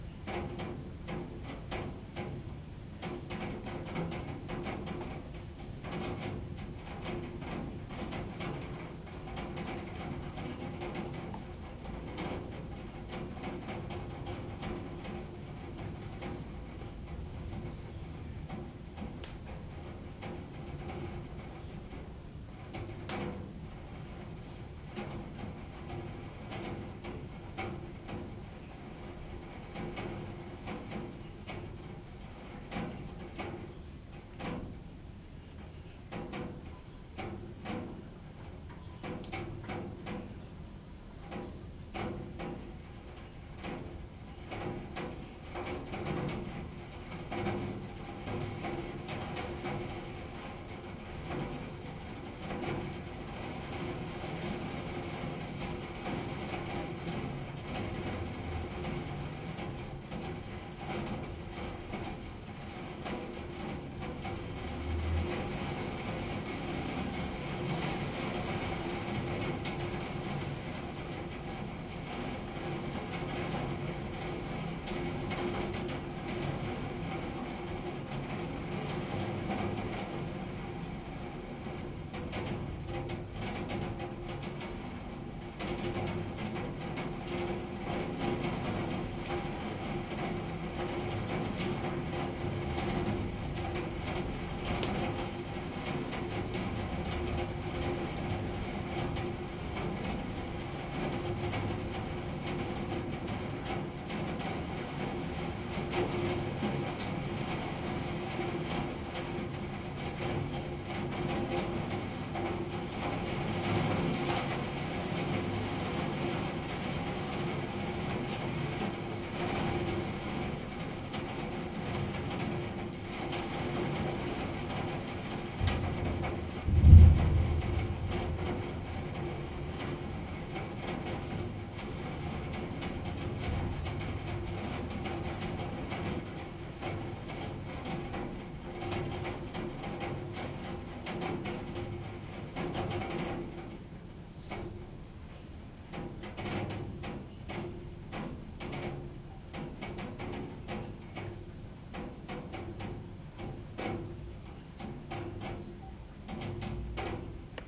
rain on the window + thunder
Rain and thunder outside, sound on the window glass. A little thunder on the background. Recorded on a cheap fifine microphone.